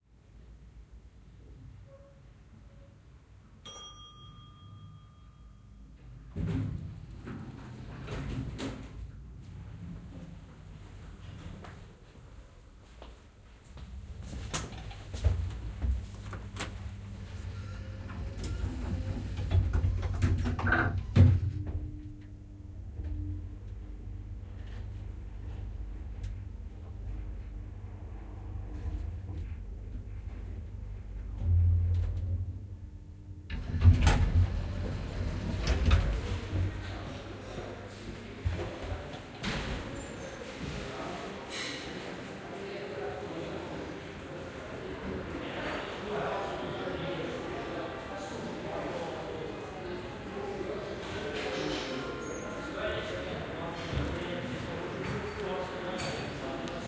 minsk hotel liftzurestaurant
I am taking the lift from the 6th floor to the 2end floor, where the restaurant takes place. heading for breakfast.